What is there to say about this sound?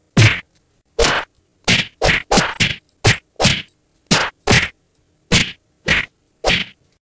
multi punch
this Wave has 11 or more hits
11, fast, punches, slow